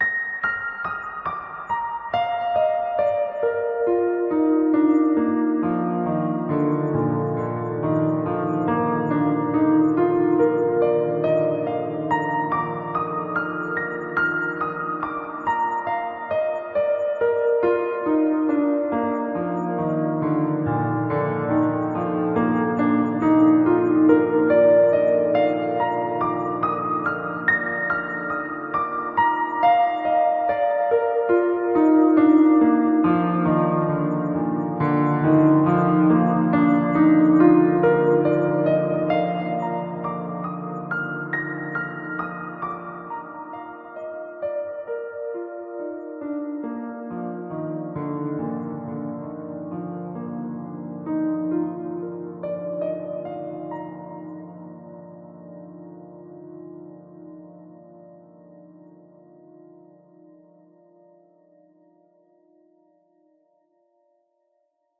[37] s-piano down-up 3
Piano loop I played on my Casio synth. This is a barely adjusted recording with a record-tapeish chorus already added in the synth.
downwards, loop, piano, tape, upwards